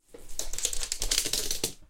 dog running on stone tiles 1
Dog running on stone tiles